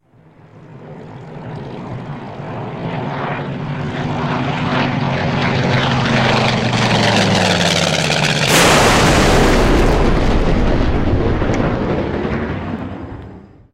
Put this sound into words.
Air plane crash sound.
Low Airplane Fly By by Snipperbes
Edited with Audacity.
plane, tnt, new, collision, aeroplane, terrorism, destructive, explosion, terror, world-trade-center, foley, 911, aircraft